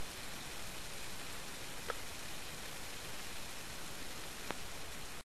JBF Soda Fiz